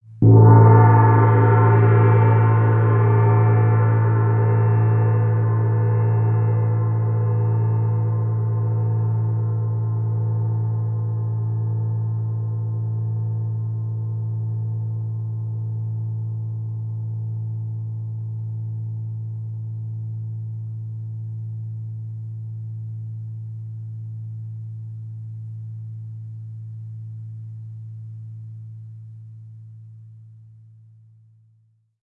Gong Brilliant Paiste 32"
Brilliantgong, gong, Paistegong, sound